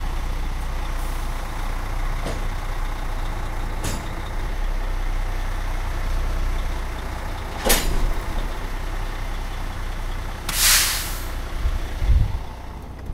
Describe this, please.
tractor connecting to trailer with air brk
here is a tractor trailer connecting together